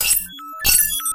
bad telecommunications like sounds.. overloads, chaos, crashes, puting.. the same method used for my "FutuRetroComputing" pack : a few selfmade vsti patches, highly processed with lots of virtual digital gear (transverb, heizenbox, robobear, cyclotron ...) producing some "clash" between analog and digital sounds(part of a pack of 12 samples)
synth, analog, soundtrack, retro, movie, space, off, spaceship, info, scoring, computing, film, soundeffect, cartoon, sci-fi, fx, bleep, soundesign, lab, future, digital, switch, funny, data, computer, signal, commnication, synthesizer, effect, oldschool